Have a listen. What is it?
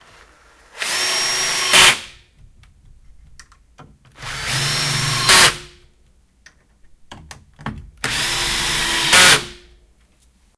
Driving three screws with an electrical screwdriver. Recorded with Zoom H4.
Tool, workshop, Werkzeug, tools, work, Screwdriver, noise, machine, Electrical